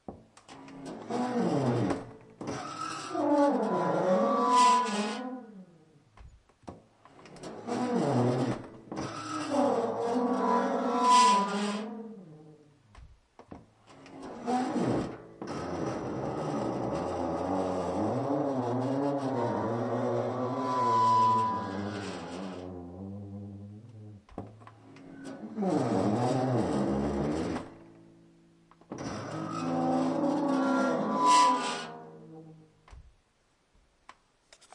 Creaking dishwasher 4 ST
My dishwasher's door is really creaking... Sounded very interesting to me.
Stereo File Recorded w/ Marantz PMD 661, Int Mic.
creak, dishwasher, door, squeak